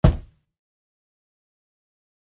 Varied hits on materials in my basement - cardboard boxes, a treadmill, wooden table, etc...most of the sounds from this pack were extracted from a recording of me striking said objects with my palm.
Because of proximity effect, I found some of these to be useful for the sound of an object hitting the ground.
noise, ground, 2x4, foley, thud, floor, thap, tall, tap, wood, impact, drop